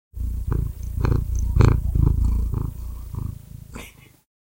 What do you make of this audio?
Cat purring
kitten, happy, cat, kitty, animal, feline, meow, purr, purring